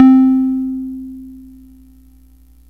Fm Synth Tone 11